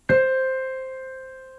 Octave Do-C
Piano Octave C
Piano, C, Octave, Do